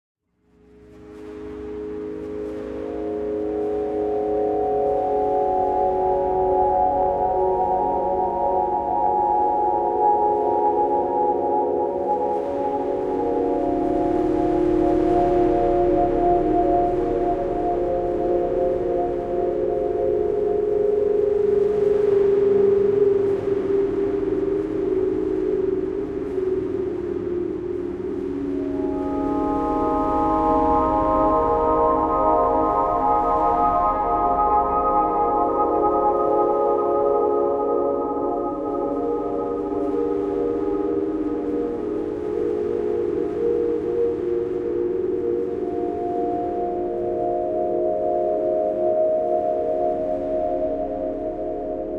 Relax Ambient Sea Music Short
Relax Ambient Sea Music